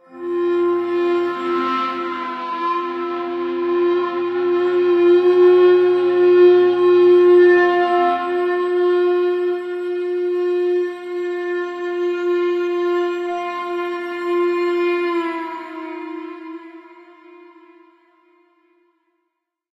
The Witch house 2
Hello friends!
HQ dark ambient pad. Best used for horror movie, game dark scene etc.
Just download!
Enjoy! And best wishes to all indie developers!
ambience, ambient, atmosphere, cinematic, dark, drama, drone, film, horror, pad, scary, sinister, soundesign, synth, terror